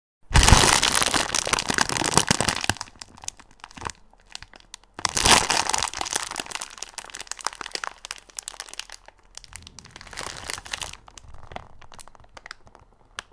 the sound of someone's bones getting chrunch